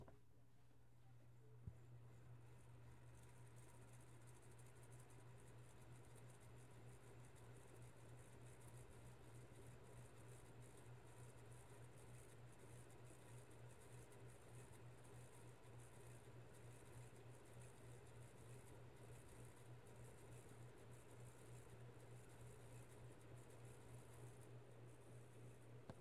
Old Ceiling Fan Running
ventilation, ceiling, hum, fan, background-noise